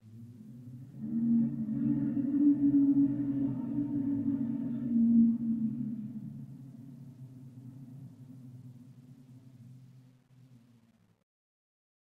CR SharktopusRoar1Depths
Sharktopus roar, distant perspective.
monster, water, octopus, roar, shark